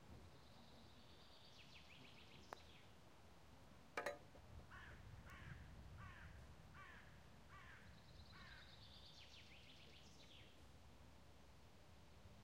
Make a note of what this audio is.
HSN golf put and hit
Golf put on the green hits the flag close and into the hole; crows croaking like laughter;
golf, sports, swing